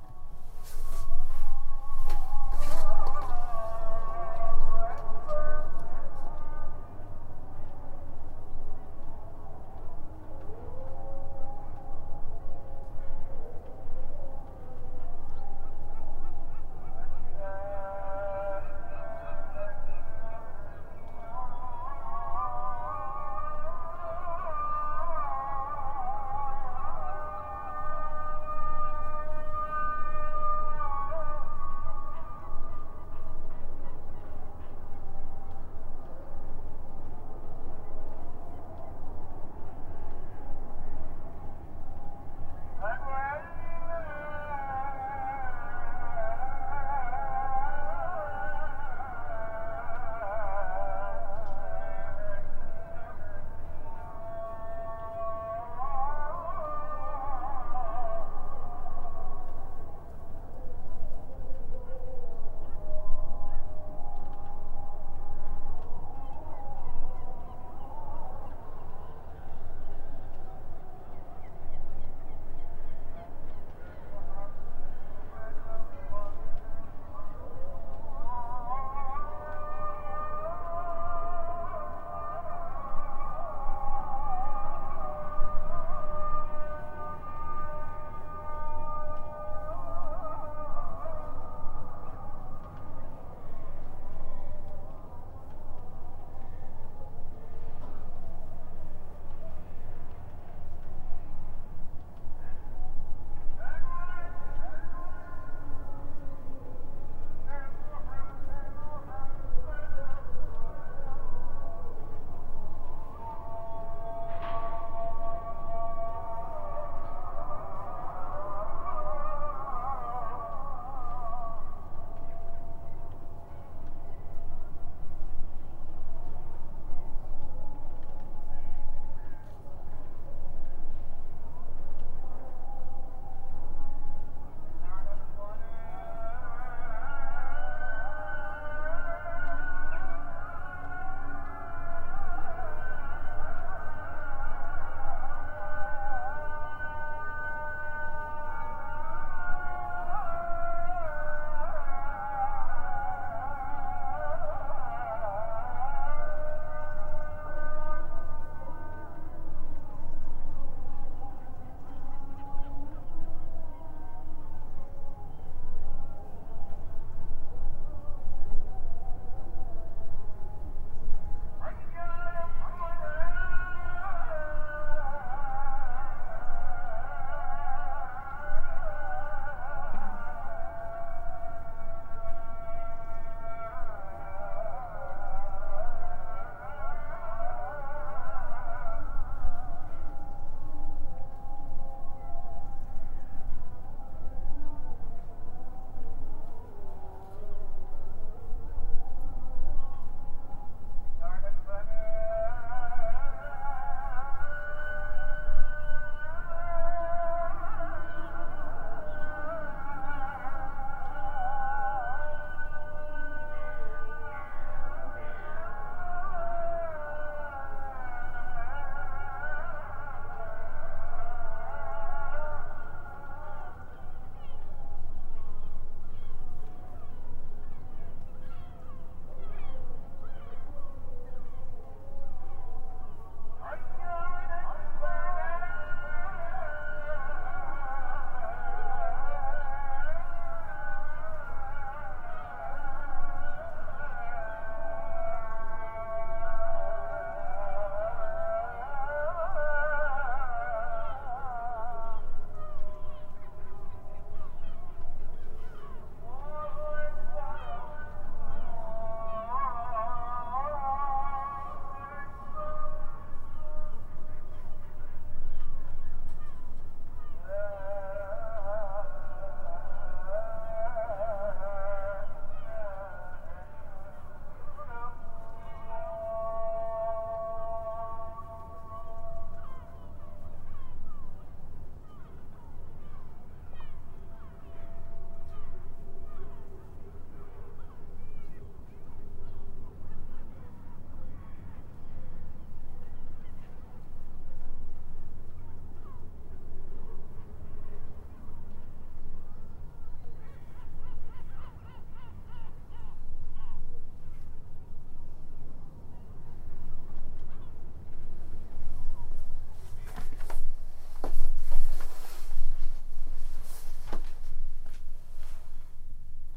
ezan-distant
This is recorded from Maiden's tower in Bosphorus strait of Istanbul, Turkey. It has the distant sound of Ezan (Islam prayer).
geo-ip, turkey